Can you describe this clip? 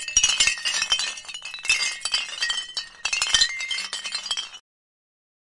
Beer Bottle Lights
There are days when I am too tired to think. There are days when I am too tired to articulate myself clearly. There are days when I am too tired to pursue projects that I find to be valuable and worthwhile. Precarious labor has rendered this exhaustion nearly permanent for an increasingly large percentage of laborers in the First World. I refuse to acknowledge the necessity or validity of this particular way of organizing work. Fuck fatigue fuck exhaustion fuck being exhausted and underpaid fuck working too much for nothing fuck student debt fuck racialized dispossession and massive inequality all of this is to say fuck capitalism and its prophets.
drum-kits, field-recording, percussion, Sample-pack